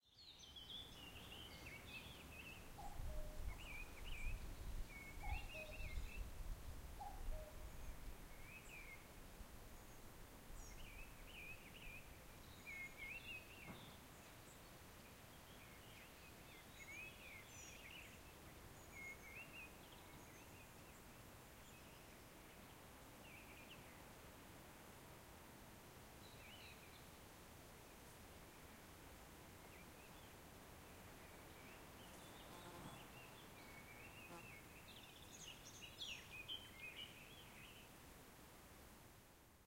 Recording with low levels, made in a British country house park, somewhere in southern England early summer, warm day, birds singing, wind in the branches, insects, background noise.